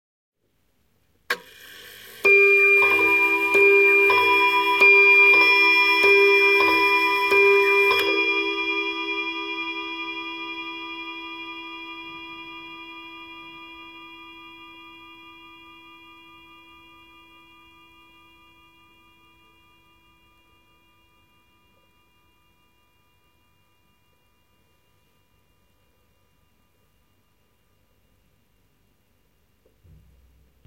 Antique table clock (probably early 20th century) chiming five times.
antique, chimes, clock, five, hour, o, pendulum, time